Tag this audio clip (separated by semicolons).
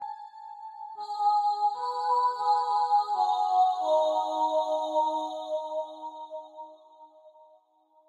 choir; chord